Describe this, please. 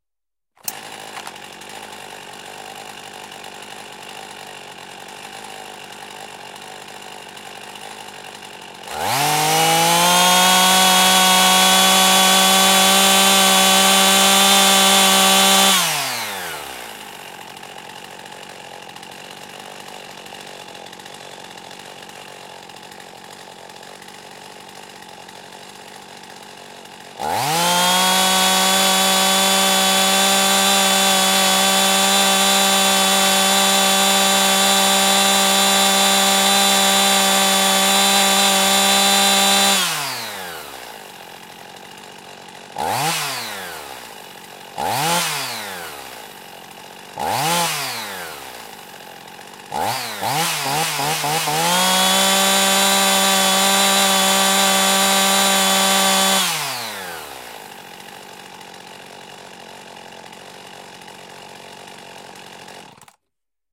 Chainsaw - Idle with Revs
Pull starting a chainsaw, followed by 10 seconds of idling, and then one short rev, one long rev, three short, small revs, and one final rev.